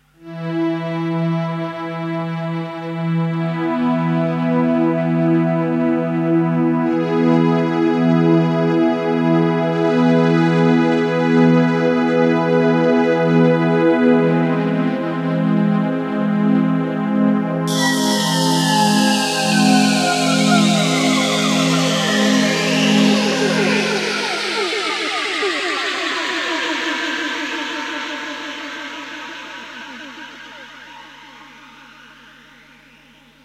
string effect
Sampled impression of one of the sounds I made on my Roland D50.